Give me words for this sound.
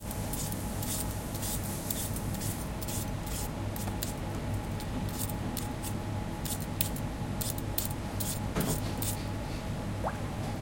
taking-off-potatoskin
taking off a potato skin.
peeling, vegetables, potato, kitchen